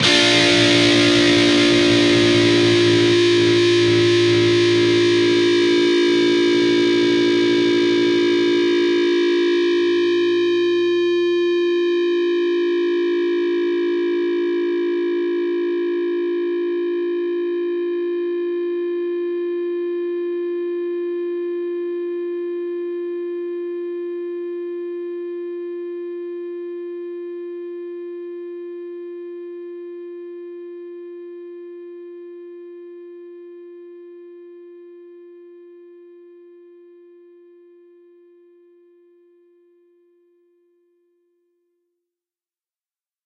Dist Chr Dmin 2strs 12th
Fretted 12th fret on the D (4th) string and the 10th fret on the G (3rd) string. Down strum.
guitar, guitar-chords, lead, chords, distorted-guitar, distorted, lead-guitar, distortion